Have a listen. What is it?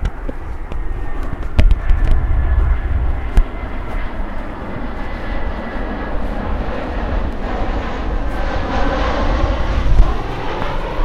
A airplane in the Delta of Llobregat. Recorded with a Zoom H1 recorder.
airplane, Deltasona, elprat, llobregat, wind